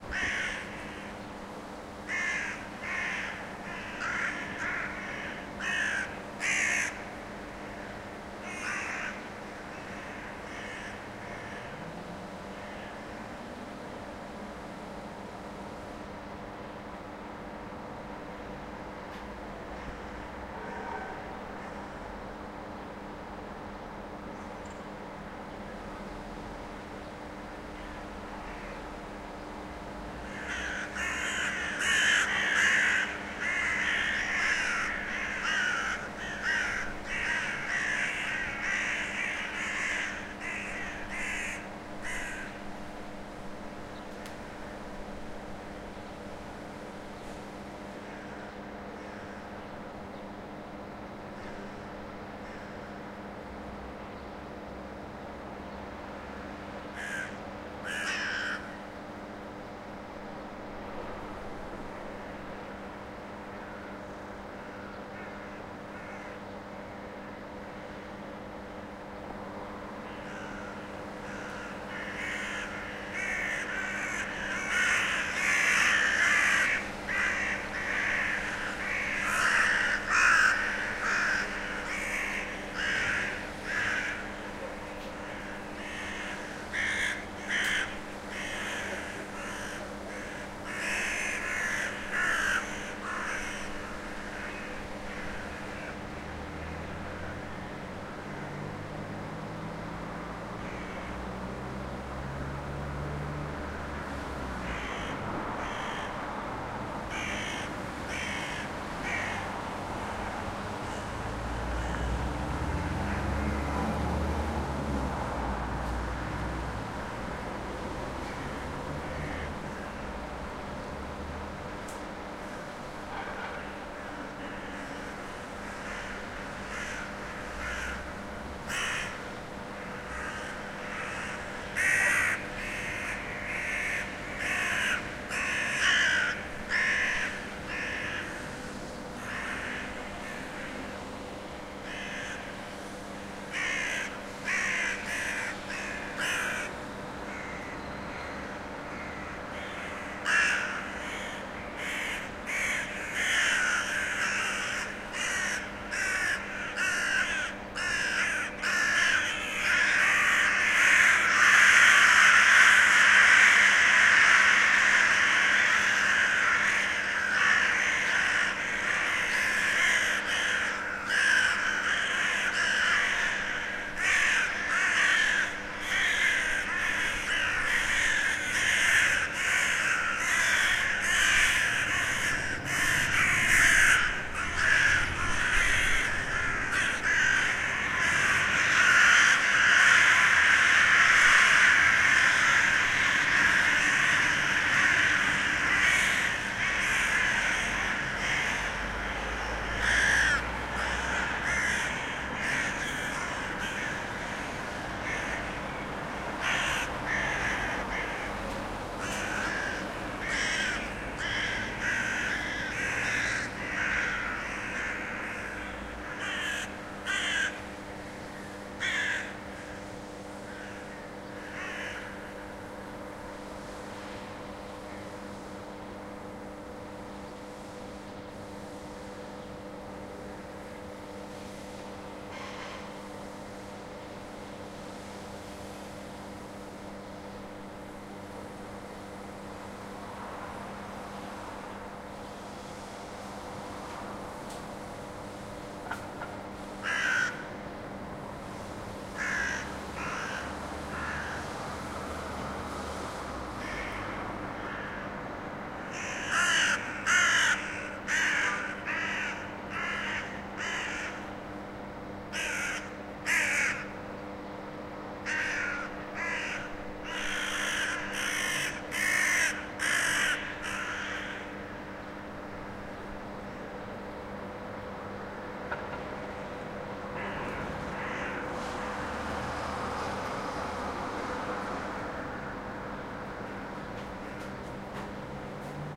Flock of crows fly & croak around a house in Ostankinskiy district, Moscow
Roland R-26 XY mics